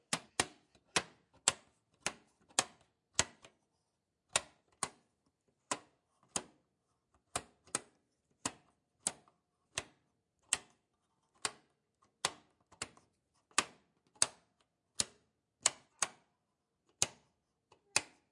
Sounds recorded from an old electric stove, metal hinges, door and switches.